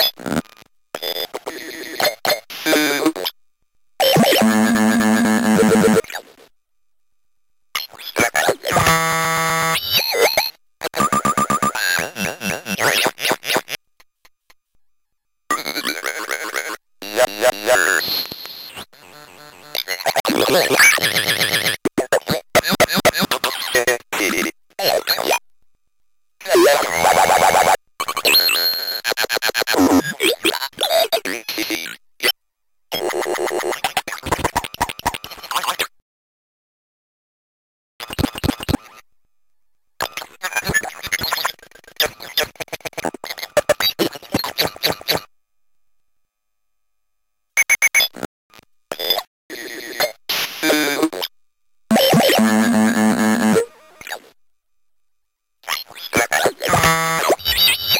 Speak Live Cut
My circuit bent speak and spell run through the live cut plug-in. Tons of possibilities here to cut it up for one shots are use bigger pieces for loops.
noise, speak, spell, circuit-bent, speak-n-spell, glitch